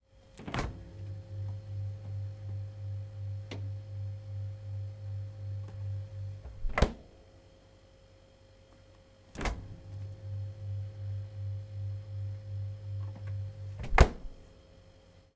HOUSEHOLD-FRIDGE-Whirlpool-brand fridge cooling, opening and closing fridge door 001
Medium sized Whirlpool-brand fridge cooling process, opening and closing door.
appliance, close, compressor, cooler, domestic, door, electrical, fridge, household, hum, kitchen, motor, open, refrigerator